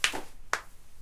My poor knees
Foley used as sound effects for my audio drama, The Saga of the European King. Enjoy and credit to Tom McNally.
My knees really make this noise sometimes when I stand up. It's kind of ghastly but I thought I'd share. It's somewhere between a pop and a click as the muscles get caught on the knobs of my bones and then snap into place with enough force.
The track needs some cleaning up as it's a little noisy.
click, knees, cracking, fingers, crack, pops, body, snapping, popping, snap, joints, cracks, pop